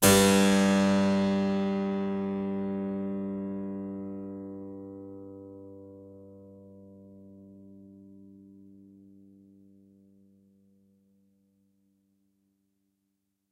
Harpsichord recorded with overhead mics
instrument, Harpsichord